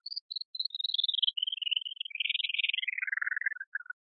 Created with coagula from original and manipulated bmp files.